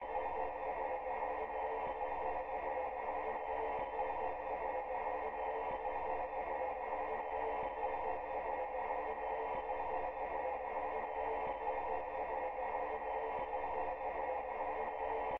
Voice aah techno
This is an atmosphere that I had recorded and I used it on a techno track
techno, electronic, synth, synthetizer